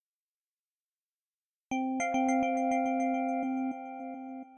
Made a sound similar to th massive attack song Special Cases of of 100th window by accident using a old sampler and a sample of a chime.